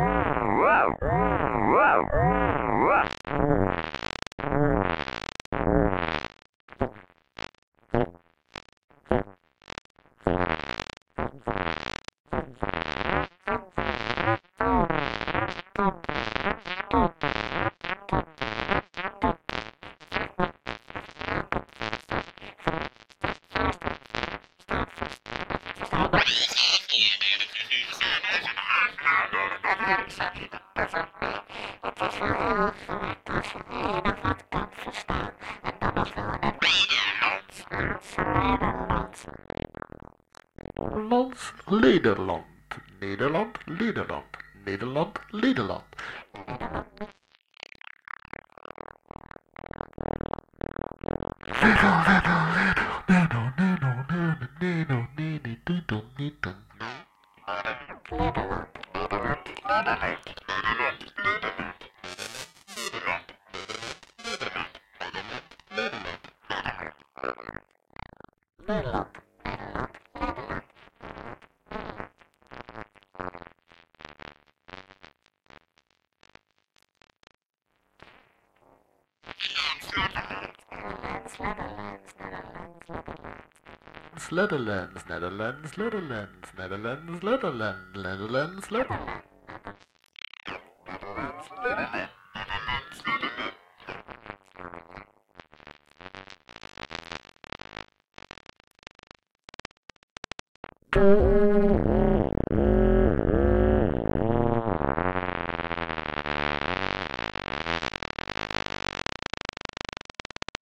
Fourth ironspring all_out Nederland_Lederland Netherlands-Leatherland.
Long sound at the end
homemadevocoder, vocodervice1, 4